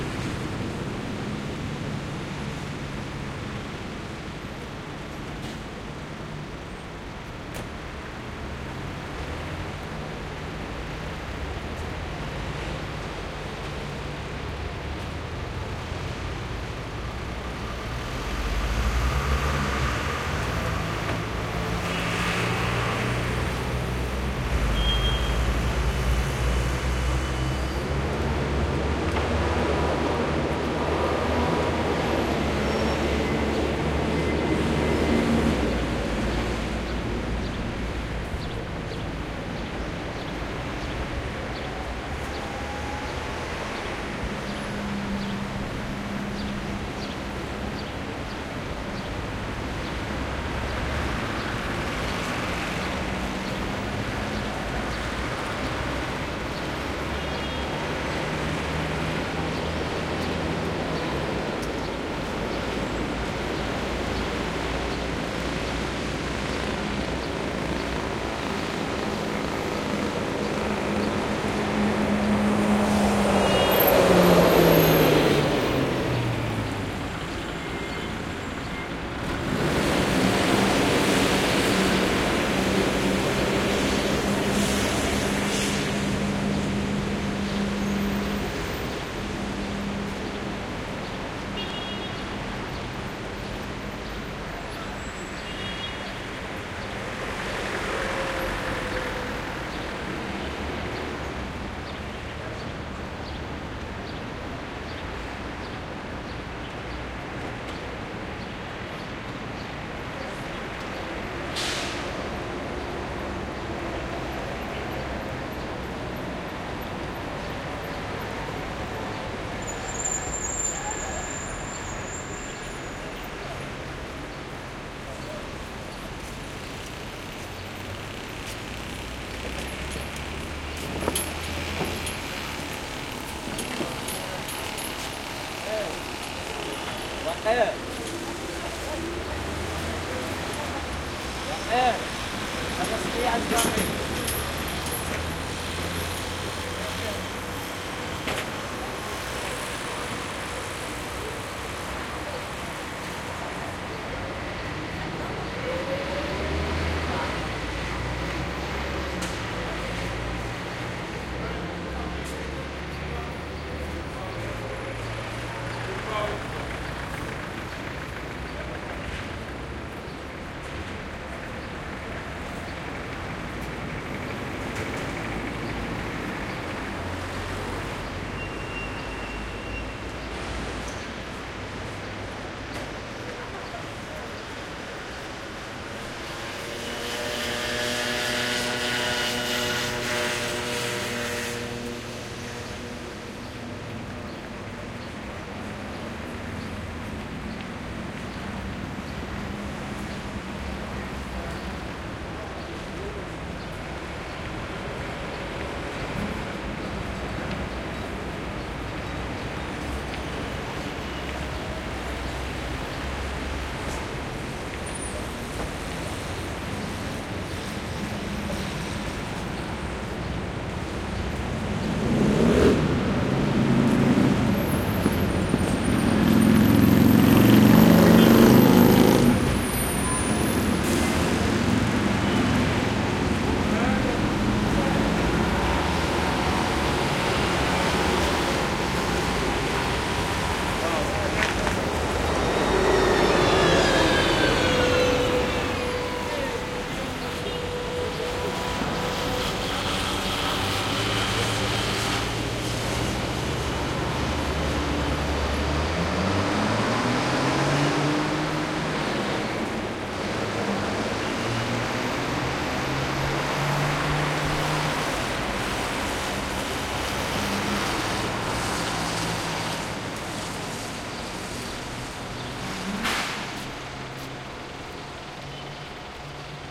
traffic medium morning activity dense noisy throaty mopeds Old Medina Casablanca, Morocco MS
activity, Casablanca, dense, Medina, medium, mopeds, morning, Morocco, noisy, Old, throaty, traffic